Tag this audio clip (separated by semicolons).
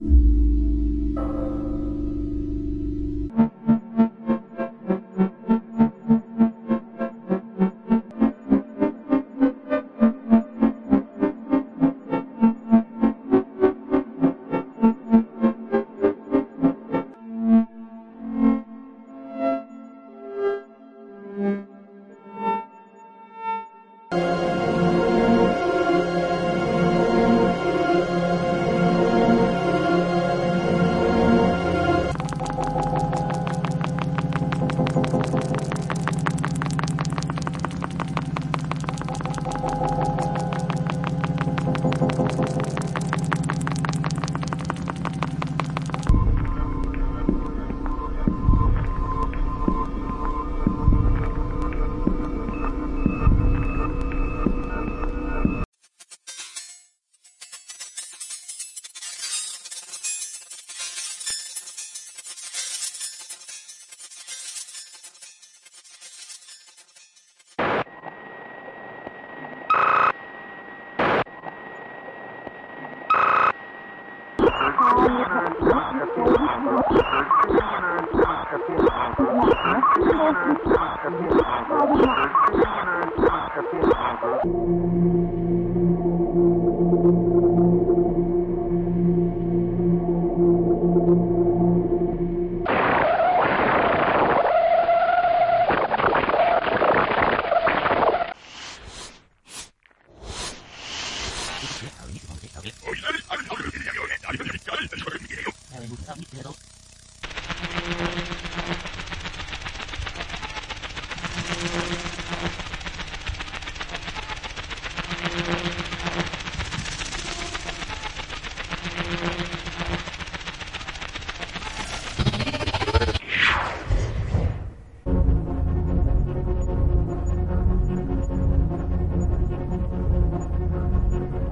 mgreel; morphagene; processed; radio; robin-rimbaud; scanner